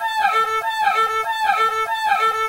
Looped elements from raw recording of doodling on a violin with a noisy laptop and cool edit 96. One final shower scene variation but more of an after effect. Resolution or reiteration.
loop, climax, violin, cadence, noisy